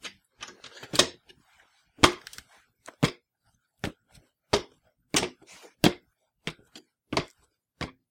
Footsteps-Step Ladder-Metal-02
This is the sound of someone stepping/walk in place on a metal step ladder. It has a sort of flimsy metal walkway sound too it.
Walk, Run, walkway, ladder, Footstep, Step, metal, step-ladder